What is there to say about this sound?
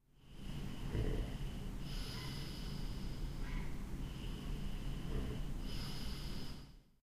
A heron screams. It's far past midnight may be early in the morning and I'm asleep. I switched on my Edirol-R09 when I went to bed.
bed, body, field-recording, human, noise, street-noise